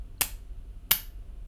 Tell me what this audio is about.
Switch on-off
Button, On, Off, Click, Switch, Plastic